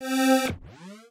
Good day. This atmosphere, texture sound make by Synth1. Hope - you enjoy/helpful
sounddesign, gamesound, fx, gameaudio, sound-design, sfx, effects